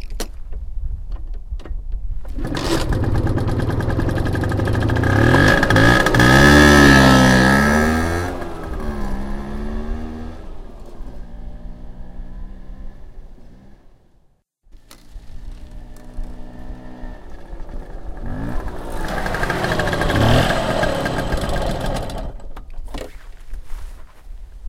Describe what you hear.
Starting and driving my vespa